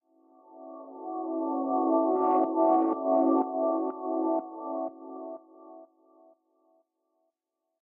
Neo Sweep
A light sweep effect useful for subtler transitions